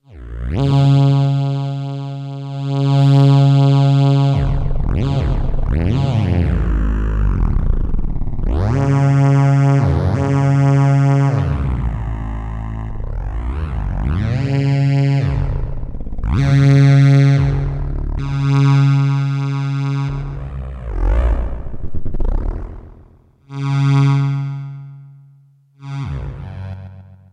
thereminmidi2echo
Virtual theremin sounds created with mousing freeware using the MIDI option and the GS wavetable synth in my PC recorded with Cooledit96. There was a limited range and it took some repeated attempts to get the sound to start. Second voice option with echo.
sample, free, mousing, sound, theremin